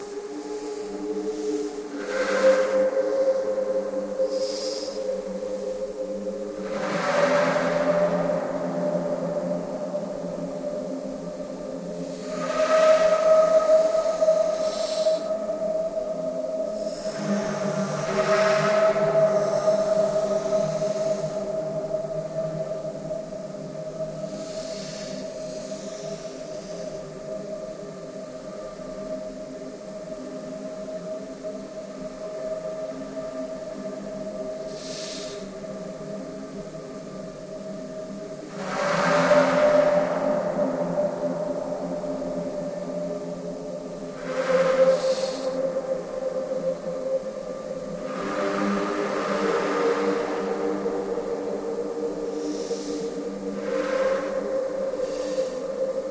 Ambient Foley - Garage Like
Ambient foley, recorded myself playing the piano on an old 80s cassette recorder... stretched it and ran it through a convolution reverb.
This is the result, almost sounds like the intro to a Burial track.
Would work great in ambient music.
ambient, burial, foley, garage, pad